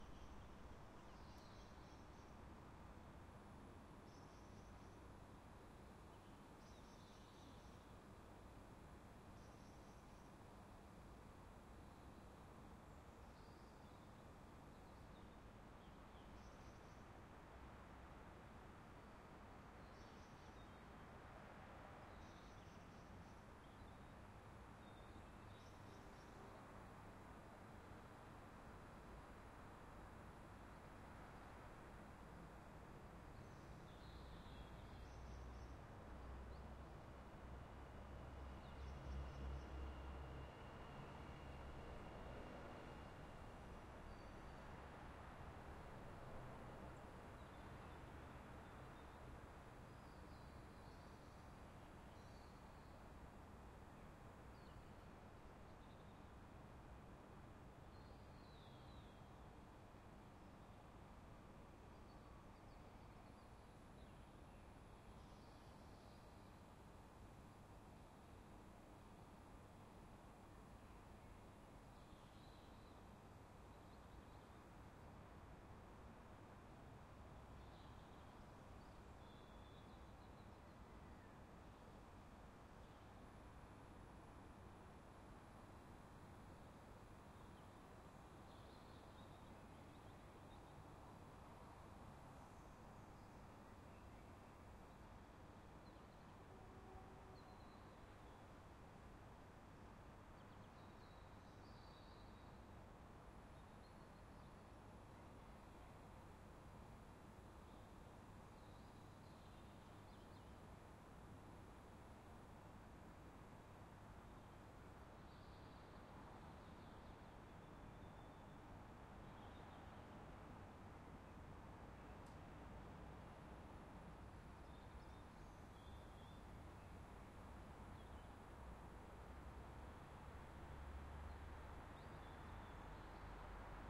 London UK Ambience Feb 2013 05
This is a stereo recording of city ambience in Greenwich, London, UK taken at around 4 in the morning. This recording is unedited, so it will need a bit of spit and polish before use.
uk, atmos, greenwich, night, night-time, london, suburban, ambience, urban, suburbs, evening, atmosphere